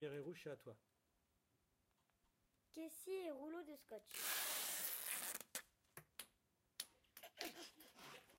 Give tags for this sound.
messac; France; mysounds

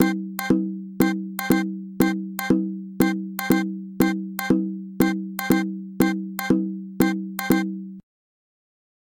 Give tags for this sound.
Recording; effects; media

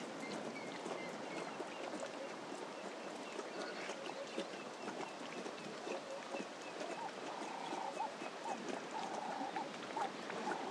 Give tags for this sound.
lion; tanzania; africa; lions

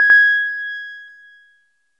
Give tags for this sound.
electric-piano; multisample; reaktor

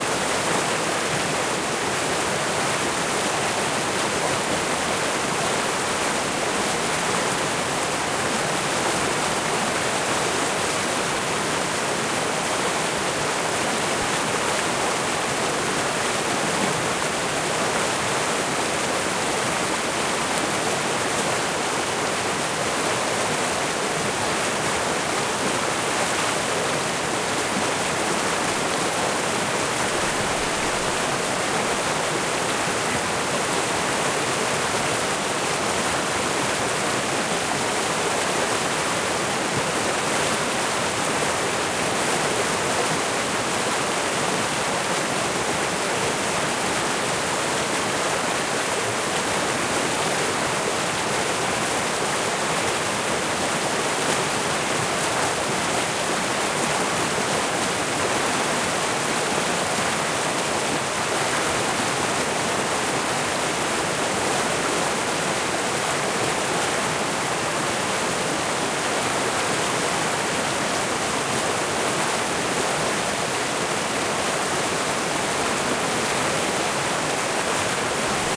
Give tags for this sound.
river; waterfall; nature; ambient; creek; flow; flowing; field-recording; stream; water

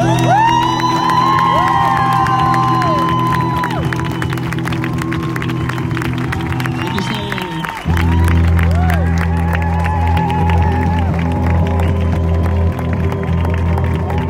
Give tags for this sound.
celebration; claps; screams

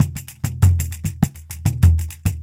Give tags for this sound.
beat; brasil; pandeiro; samba

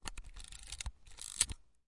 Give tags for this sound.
photography; slr-camera; shutter; click; pentax-me; camera; pentax; picture; camera-click; photo; manual; slr; taking-picture

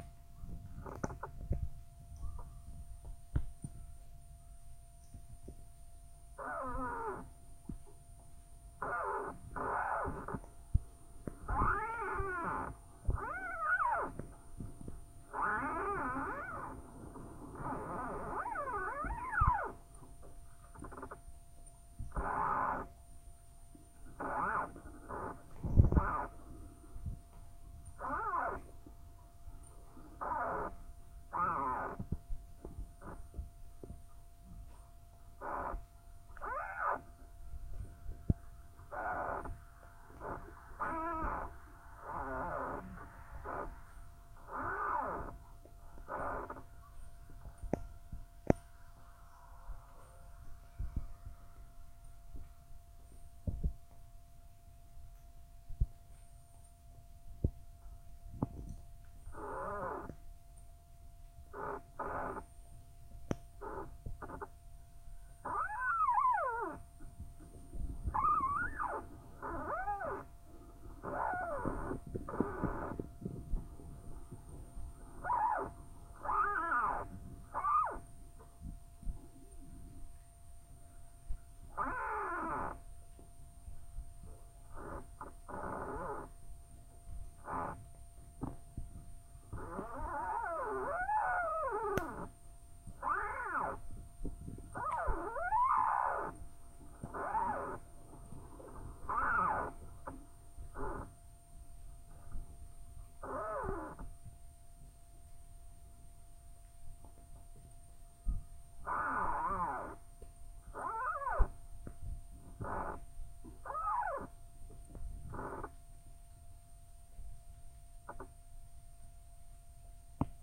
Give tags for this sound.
squeak; trees; noise; field-recording; contact; wind

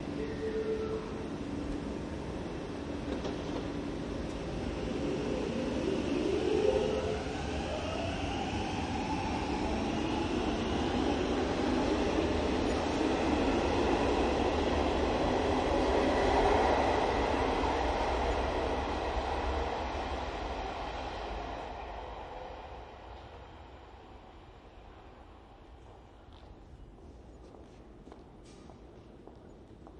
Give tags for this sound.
u-bahn; subway; underground

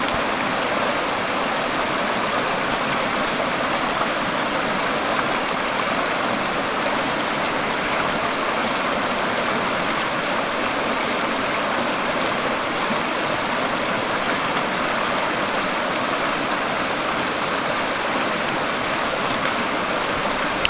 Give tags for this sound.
lake; stream; tasmania

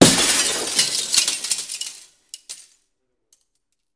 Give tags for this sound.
break; breaking-glass; indoor; window

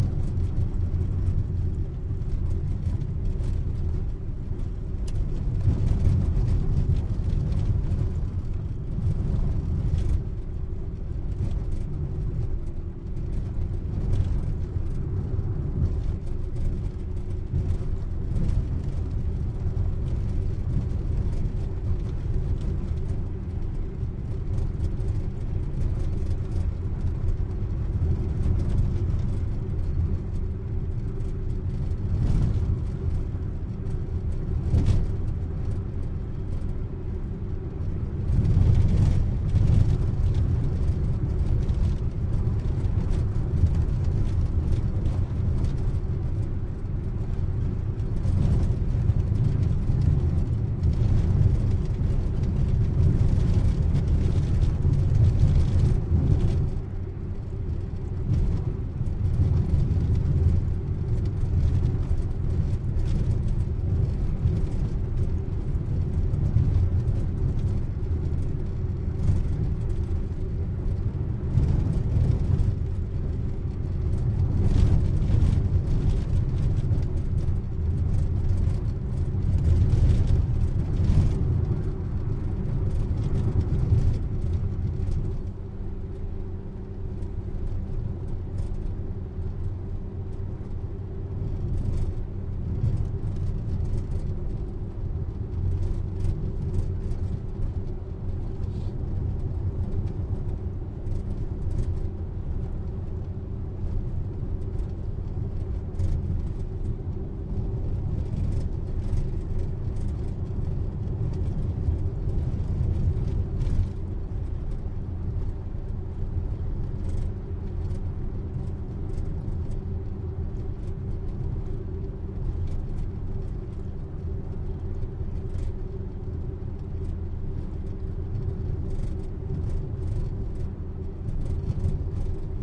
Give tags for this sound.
rattly; auto; bumpy

